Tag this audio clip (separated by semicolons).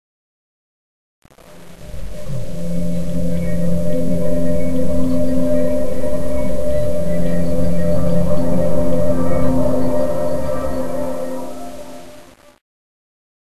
bright; change; dream; happy